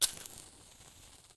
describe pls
Match Lighting Candle
A match strike and then lighting a candle